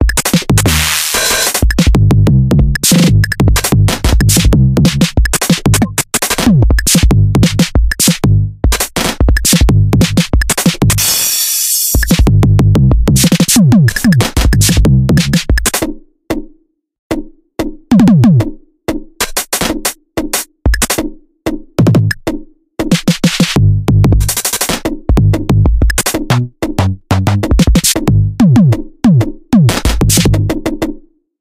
A crazy little breakbeat.